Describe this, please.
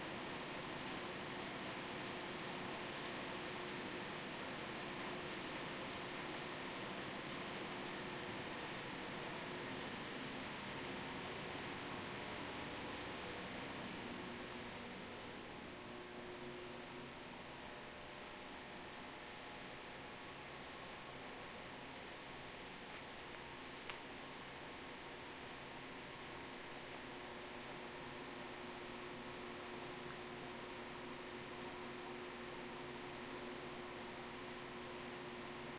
from inside a 1960's Canadian Emergency Government Headquarters or "Diefenbunker"
Binaural recording using CoreSound mics and Marantz PMD 661 48kHhz